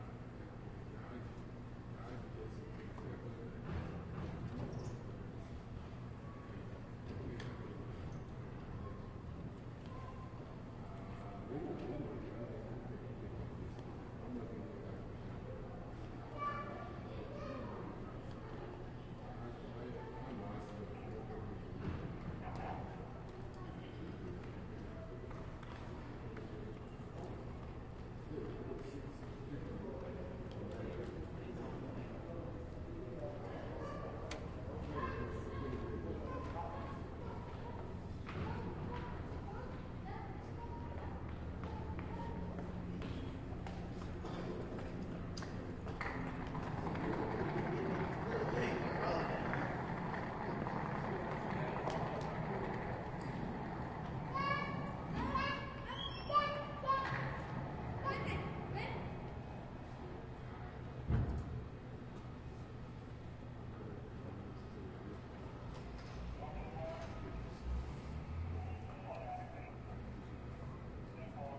Part of the Dallas/Toulon Soundscape Exchange Project
Date: 1-25-2011
Location: Dallas, Union Station, inside station
Temporal Density: 2
Polyphonic Density: 2
Busyness: 2
Chaos: 2
announcement, door-closing, footsteps, kids, train-station